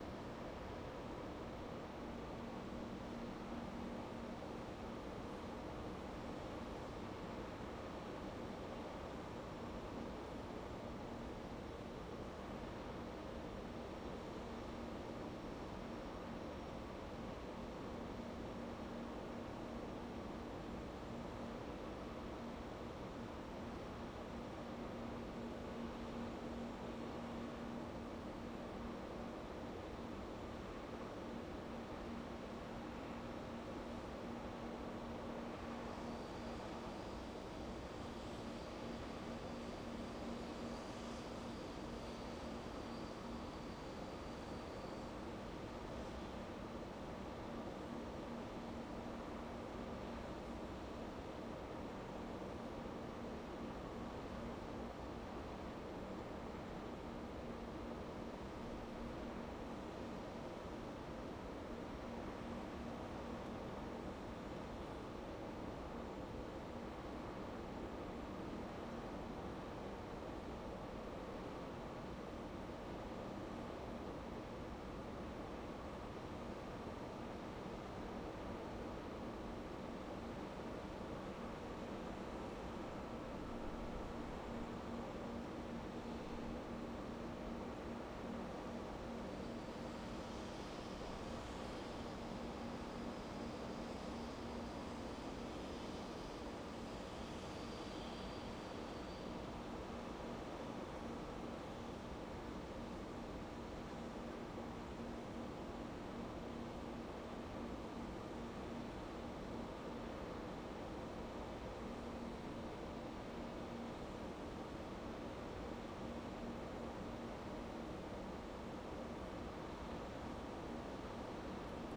General ambiance of US Steel Edgar Thomson Works from the post office parking lot on Braddock Ave, Pgh PA